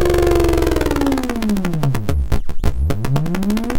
Alternate sine wave created and processed with Sampled freeware and then mastered in CoolEdit96. Mono sample stage six. Sometimes hacky sack Larry would get tired peddling up the ramp on the boardwalk. You can almost see the interaction of the wind in the spokes and the stress on his face right before he keeled over and expired.
hackey, free, synthesis, sack, sac, sample, sine, sound, hacky, larry